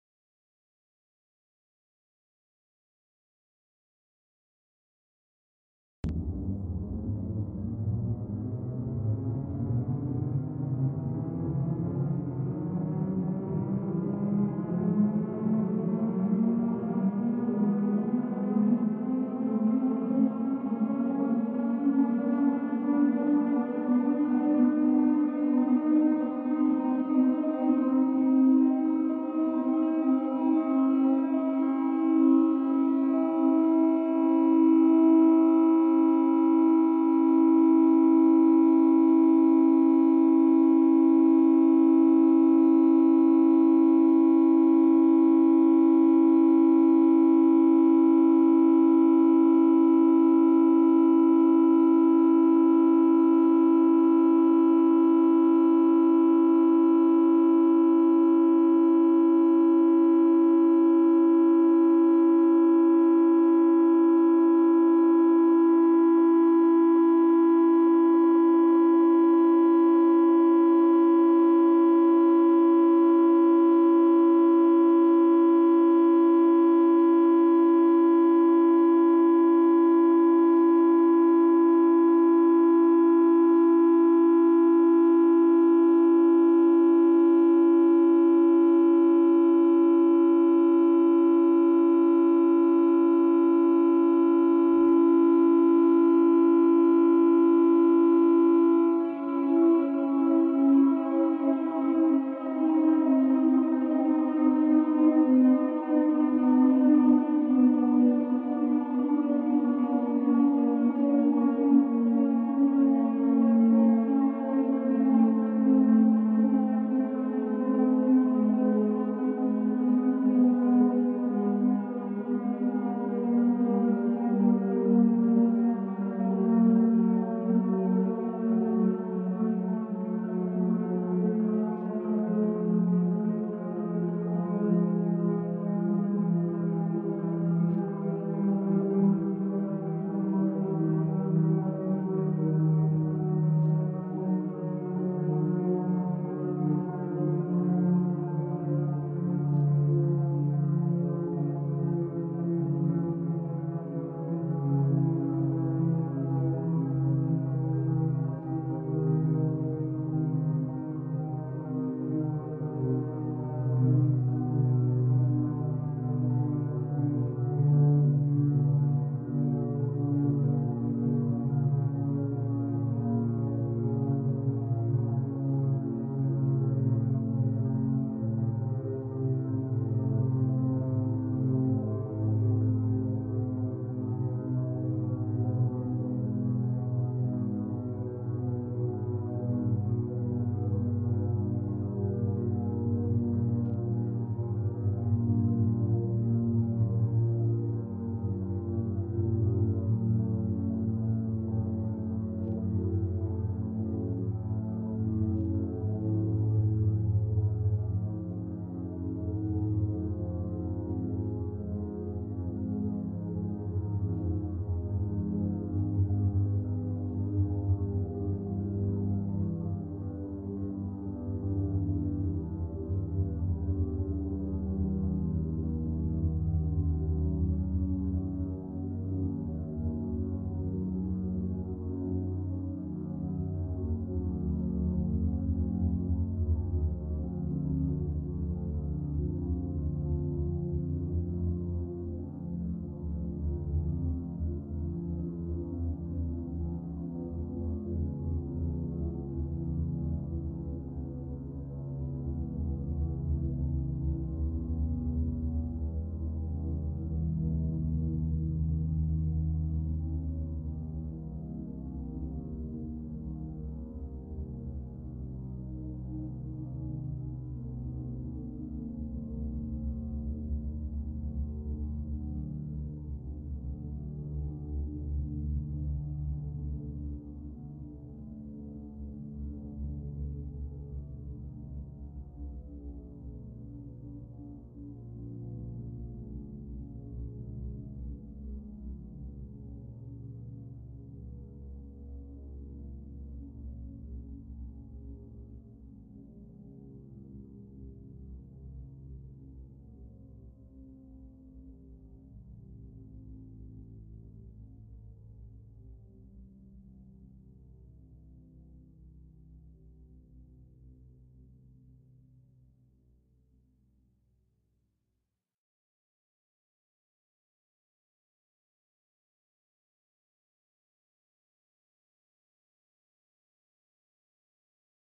User name fmagrao's sound 80761__fmagrao__siren was stretched, put in a sampler and played at C3.
It is a modification of this
An earlier version of my modification can be found at
Thanks again to these users for providing the original samples.
Regards
Crusoe
danger, warning, drone, attack, siren, shrill, testing, air-raid, war